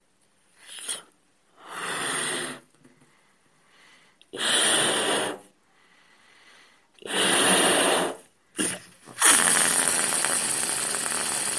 Filling the balloon with air then letting it out.